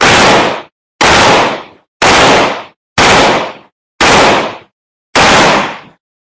Rifle training on an aircraft carrier.
semi-automatic, army, military